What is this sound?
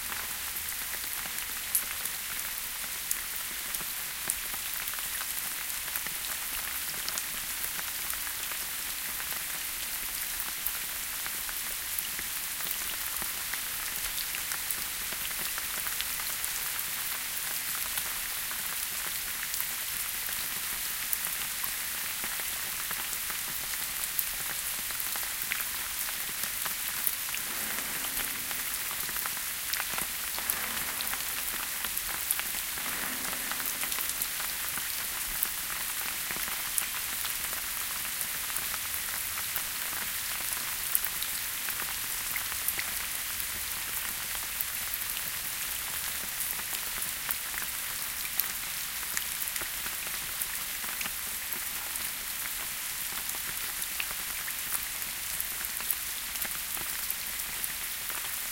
Rain Falling On Ground
Sound of rain falling on the ground. Soft rain.
Ground, Raining, Field-Recording, Water, Weather, Rain, Park, Drops